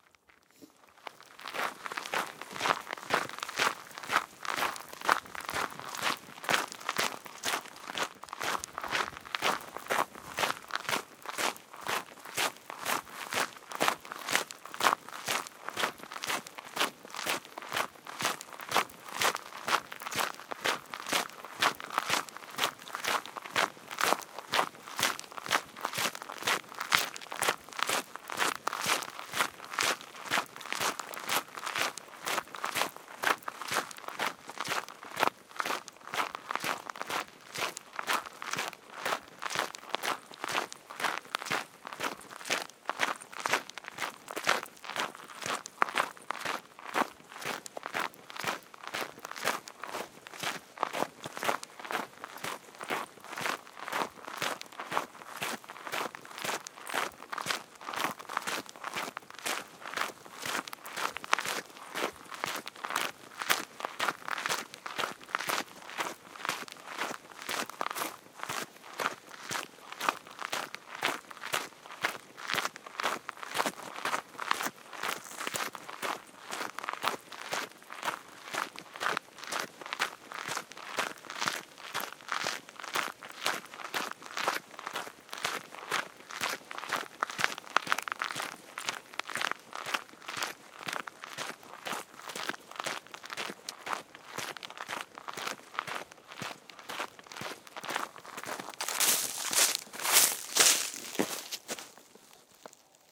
A Walk with stop
walks on the gravel road and stops next to the road. Recorded by Zoom 4 F and Sennheiser MKE 600
feet, foot, footstep, footsteps, forest, grass, gravel, step, steps, walk, walking